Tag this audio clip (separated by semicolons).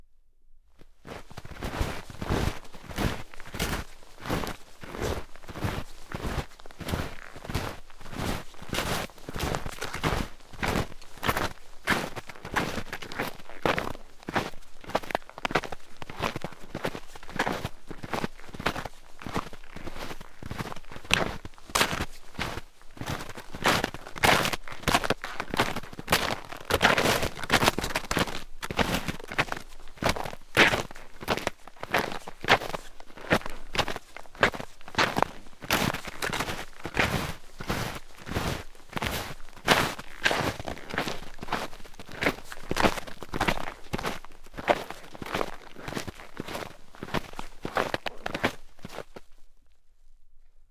crunch; field-recording; foley; footsteps; ice; nature; snow; snowshoes; winter